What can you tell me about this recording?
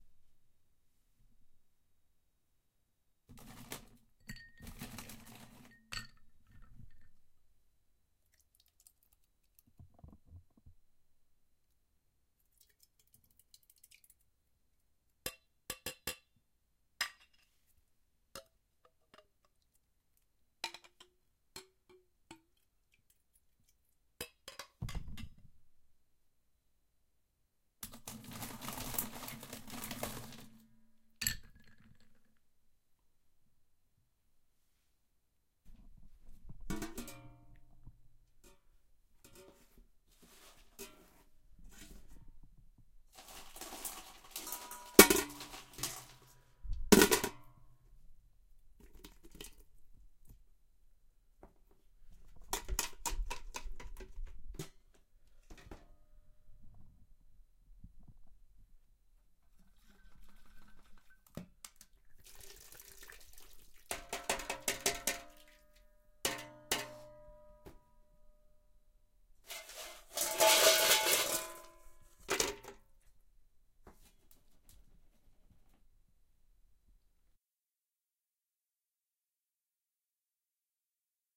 RG Alien Drink
A drink being prepared, which features: ice, the glass, stirring, pouring, liquid sounds.
drink, drink-prepare, kitchen, liquid